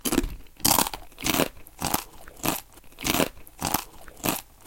eating crisps1
chips, crisps, crunch, crunchy, eat, eating, potato